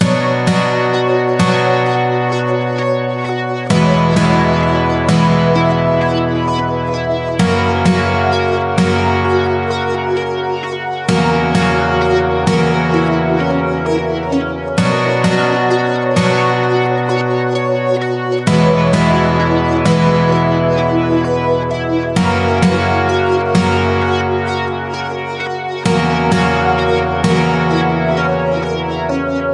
A Sad loop made in FL Studio. Sound inspired by Nothing But Thieves song "Afterlife".
2021.